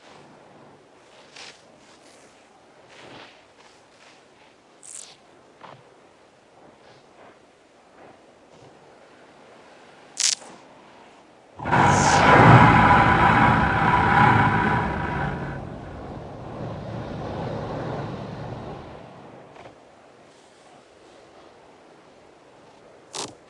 Creature Angry Bug Monster Fantasy Sci-Fi SFX Sound-design Foley 201203 0086
Wind, Drone, Dark, Animal, Sound, Monster, Strange, Angry, Spooky, Amb, SFX, Horror, Atmosphere, Ambiance, Eerie, Scary, Sound-design, Roar, Scream, Creepy, Growl, Movie, Sci-Fi, Snarl, Foley, Creature, Film, Ambient, Bug, Fantasy